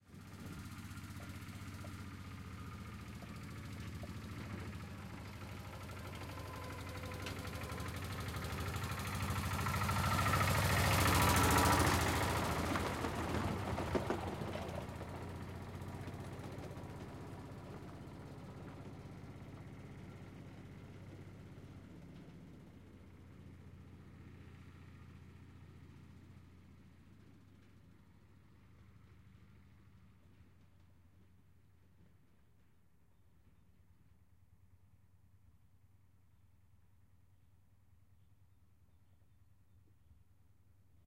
old tractor passing Hatz
Old Hatz tractor passing from far to close and driving away.
countryside, field, farm, machinery, recording, transport, agricultural